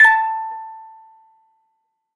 Metal cranktoy chopped for use in a sampler or something
metal, childs-toy, crank-toy, toy, cracktoy, musicbox